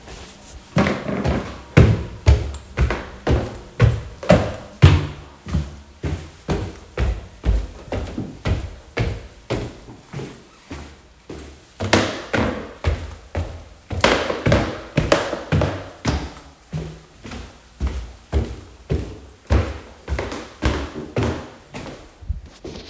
Walking up wooden stairs in cement hallway
walking up a wooden staircase with turns in a concrete stairwell Recorded with iPhone 12
footsteps, stairs, steps, walk, walking